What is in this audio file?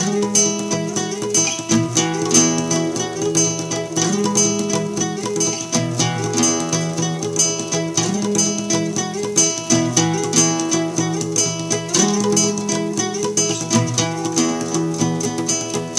OPEN UP Pt1 Guitar
A collection of samples/loops intended for personal and commercial music production. For use
All compositions where written and performed by
Chris S. Bacon on Home Sick Recordings. Take things, shake things, make things.
percussion, harmony, loop, whistle, voice, Indie-folk, original-music, drums, samples, piano, loops, guitar, indie, bass, looping, vocal-loops, beat, rock, synth, acapella, melody, Folk, drum-beat, sounds, free, acoustic-guitar